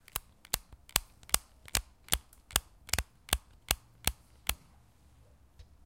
Sounds from objects that are beloved to the participant pupils at the Doctor Puigvert school, in Barcelona. The source of the sounds has to be guessed.
doctor-puigvert, mysounds, sonsdebarcelona